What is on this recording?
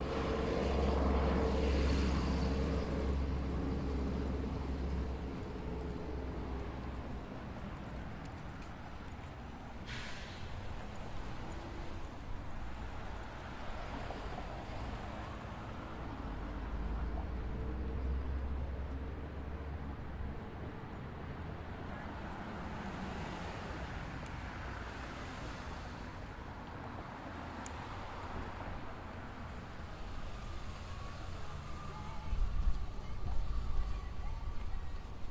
Recording of a road
crossing, cars, Road